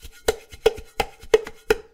Stomping & playing on various pots
playing, egoless, 0, various, vol, natural, rhytm, pot, sounds